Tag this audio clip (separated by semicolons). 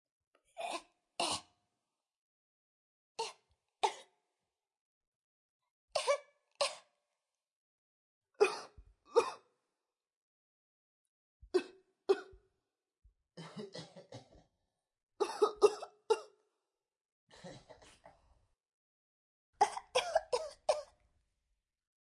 cough; human